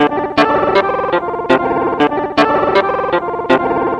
A kind of loop or something like, recorded from broken Medeli M30 synth, warped in Ableton.
broken, lo-fi, loop, motion